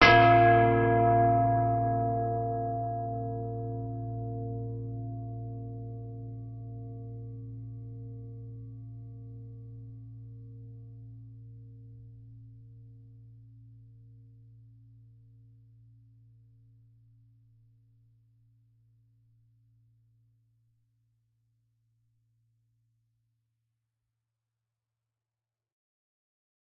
Big bell
Originally a hole saw, recorded with a Zoom H1, stretched and pocessed with audacity and Ardour 2.
ardour, bell, DIY, Home-made